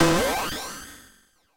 FX metallic formant5
I recorded these sounds with my Korg Monotribe. I found it can produce some seriously awesome percussion sounds, most cool of them being kick drums.
drums, percussion, drum, monotribe